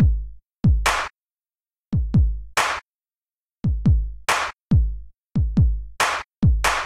8-bit drum loop